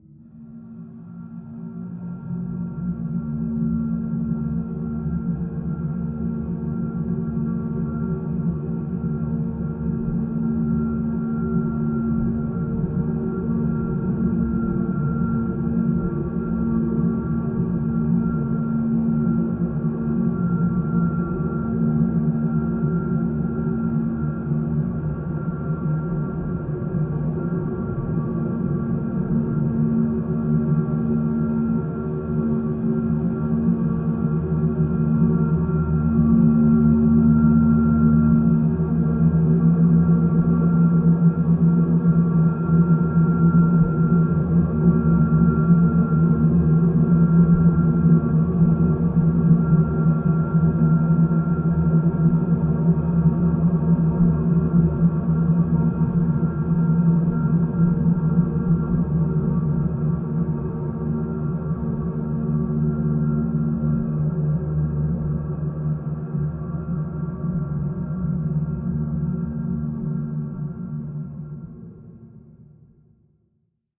I took for this sound 4 different machine sounds: a wood milling machine, a heavy bulldozer sound, a drilling machine and some heavy beating sounds with a hammer. I convoluted the four sounds to create one single drone of over one minute long. I placed this sound within Kontakt 4 and used the time machine 2 mode to pitch the sound and there you have the Industrial drone layer sound. A mellow drone like soundscape... suitable as background noise. Created within Cubase 5.